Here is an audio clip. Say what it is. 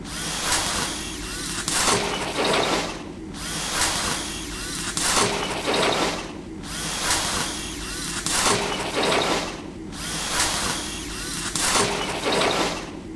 Welding conveyor 4 LOOPs-05
I machine welding and constructing Objects with welding conveyor and Robotic arm and actuators
constructing, factory, I, industrial, machine, machinery, Objects, robotic, welding